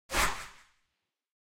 Mage Teleport Skill
curse, mage, magic, magician, skill, spell, telekinesis, teleport